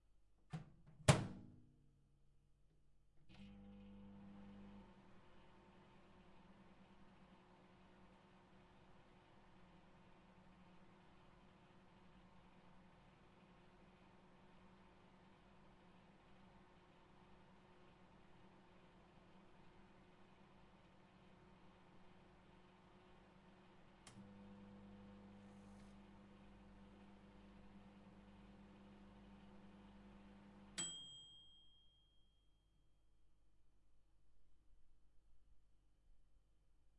microwave oven to heat, binaural recording